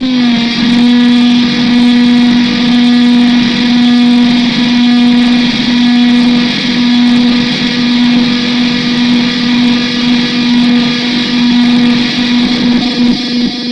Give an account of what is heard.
LAser saw
nice buzz saw sound
harsh, sci-fi, digital, fx